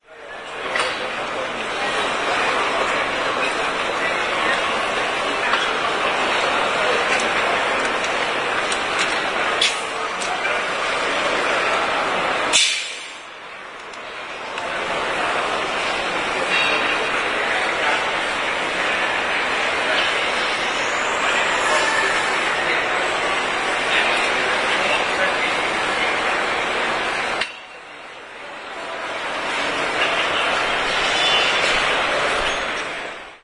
07.11.09: between 13.00 and 15.00, the KAMIEŃ - STONE 2009 Stone Industry Fair(from 4th to 7th November) in Poznań/Poland. Eastern Hall in MTP on Głogowska street: the general ambience of the fair hall